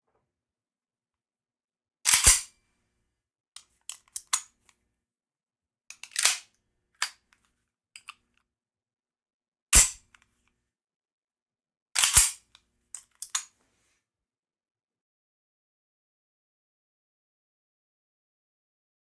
chambering my springfield 1911 .45acp